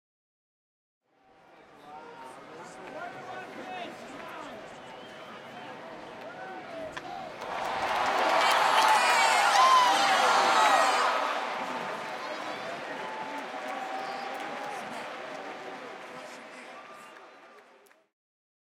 WALLA Ballpark Cheer Short Foul
This was recorded at the Rangers Ballpark in Arlington on the ZOOM H2. The crowd cheers, then gives a disappointed aw when the ball goes foul.
aw, ballpark, baseball, cheering, crowd, field-recording, sports, walla